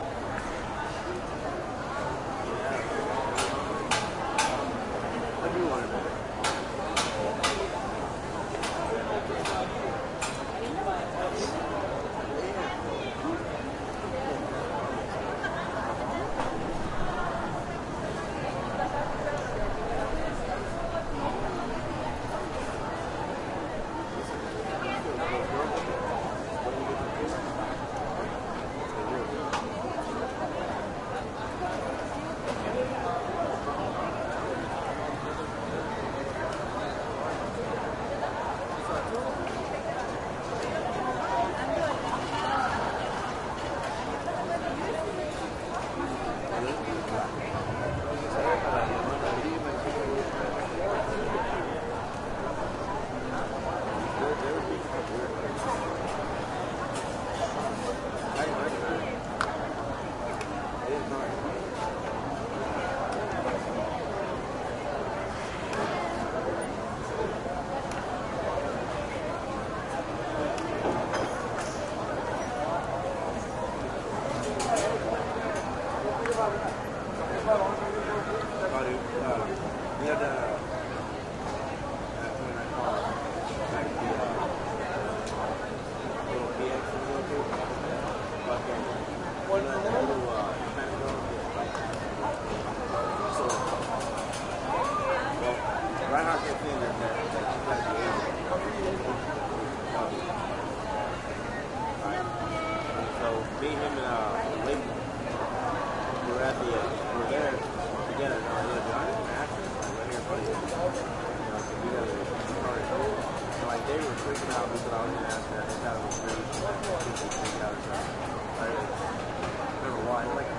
Environmental sounds recorded while sitting on a bench at LAX (Los Angeles International Airport), at lunchtime.